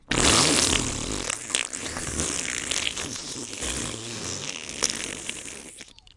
Long Juicy Fart2
Everybody has to try their hand at making fart noises. Recorded using a Blue Yeti Microphone through Audacity. No-post processing. As can be guessed, I made it using my mouth. More air-y than the others.
fart, flatulence, liquidy, squish, squishy, wet